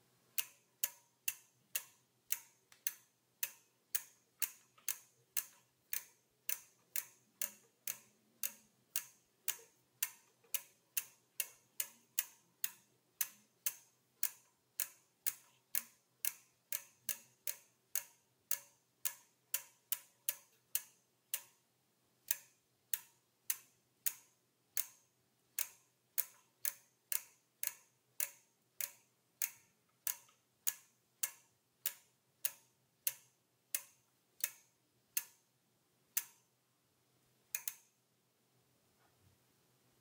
Bike wheel, coasting, slow speed
Bicycle rear wheel spinning freely, slow speed